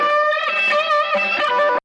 big beat, dance, funk, breaks